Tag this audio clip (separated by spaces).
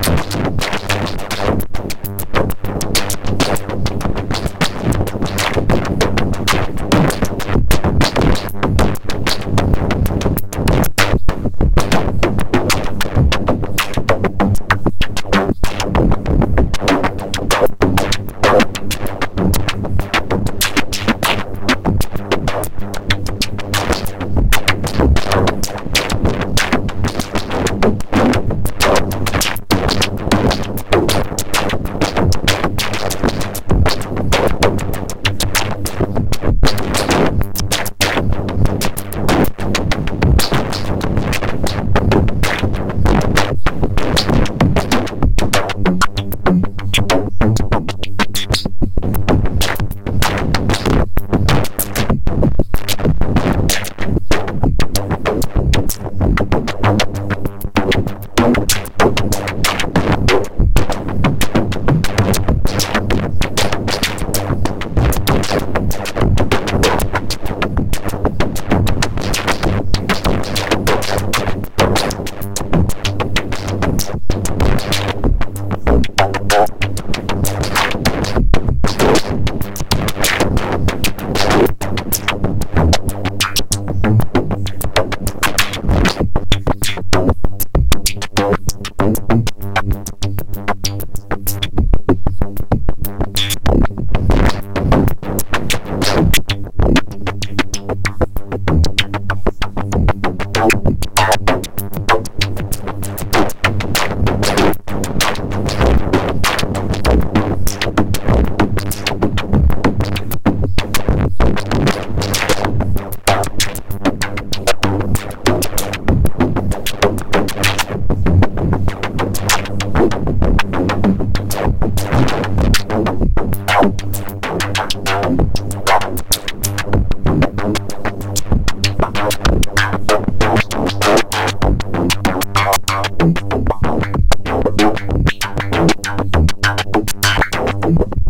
DIY Modular Synthesizer